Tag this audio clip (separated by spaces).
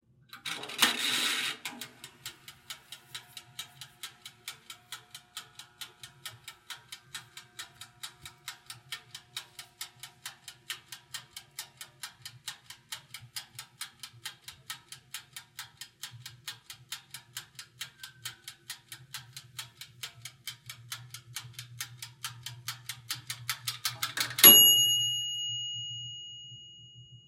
cooking music152